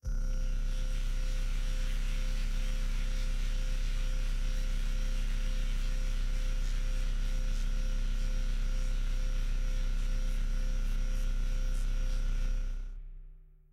sci-fi drone
digital, fx